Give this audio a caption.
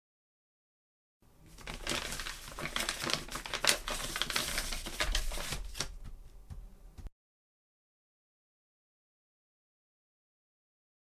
Papers rustling
Sound of papers being shuffled about on a desk for a scene in Ad Astral Episode 4 "Dream Girl.
papers, rustling, shuffle